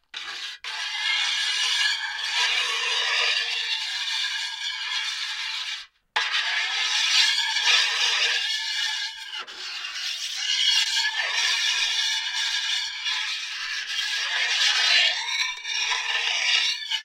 Sink knife scrape
Ingredients:
1 inox kitchen sink
1 butter knife
2 homemade contact mics
1 Zoom H1 hand-held digital recorder
sticky tape
wire up the contact mics so that each connects to one side of the stereo channel. Plug them into the Zoom H1 input.
Use the sticky tape to attach the contact mics to the kitchn sink.
Switch the recorder on. Press "Record".
Scrape the kitchen sink gently with the knife in slow circular movements.